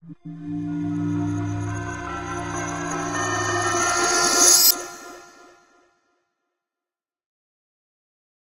Metal Spawn
Tweaked percussion and cymbal sounds combined with synths and effects.